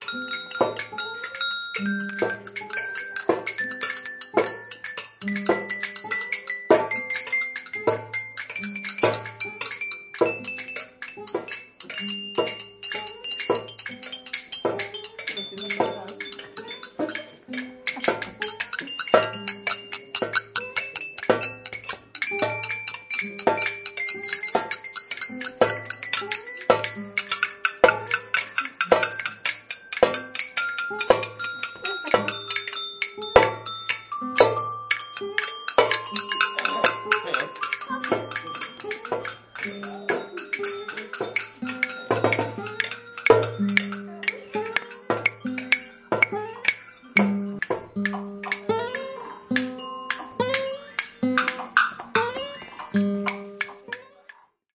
Grup Toni
Sound produced with a collective performance of the students of 4th of ESO of Institut Cendrassos of Figueres, interpreting different pulse and beats, with some irregularities and particularities. That's because we are inspired by the picture from Salvador Dalí: "The Persistence of Memory", the soft melting watches. We are playing percussion instruments and a guitar. The students recording the performance are zooming in to some of the instruments so we have different layers of sound coming to the front and going to the back again.
percussion, Institut-Cendrassos, soft-melting-watches, pulse